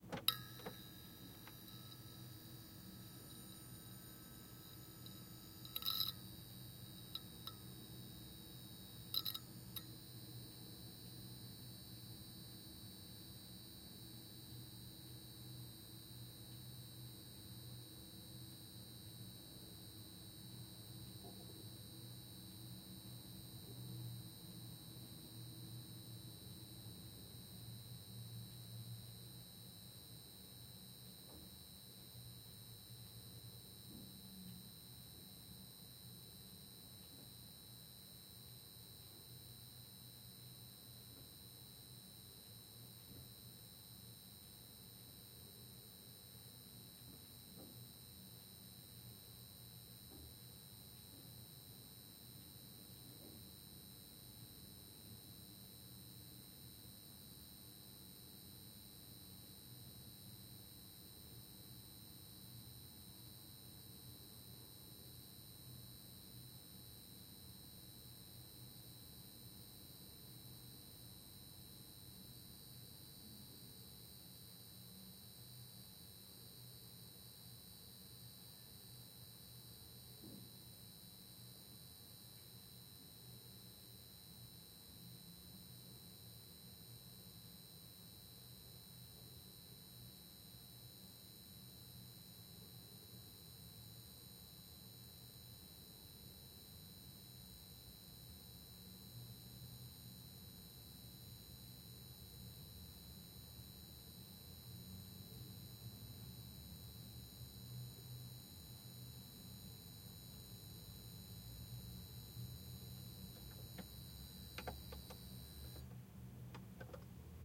Small room with Buzz Incandescent light bulb "The Ilyich Lamp"
Recorded with Sennheiser MKH40, MKH30 mid-side pair. Decoded to stereo.